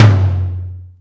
Gui DRUM TOM LO hard
Tom lo hard
guigui, set